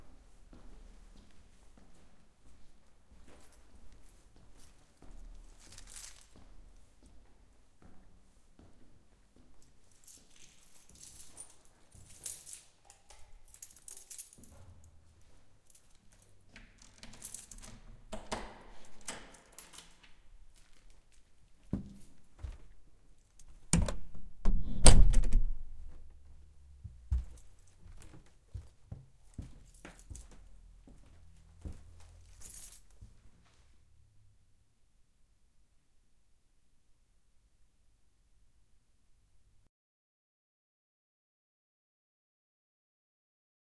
the sound of opening and closing the door of apartment located in Torkkelinkuja 4 A 17 on November 11th 2009. The sound of key chain can be also heard.
kallio, listen-to-helsinki, sound-diary, torkkelinkuja